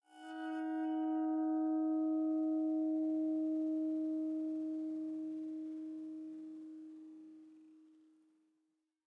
this happened while stretching a recorded sound.